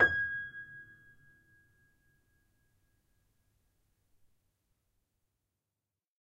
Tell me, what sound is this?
upright choiseul piano multisample recorded using zoom H4n
multisample, piano, choiseul, upright